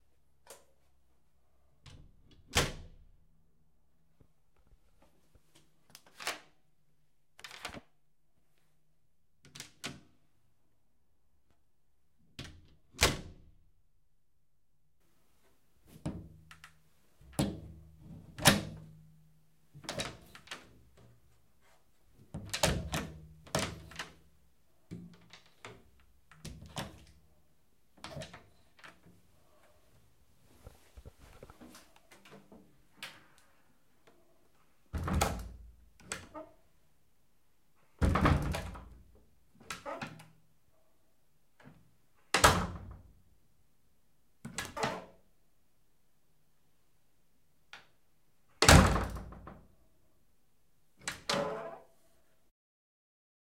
Closet Cabine Door Open Close Lock Unlock
Open, Closet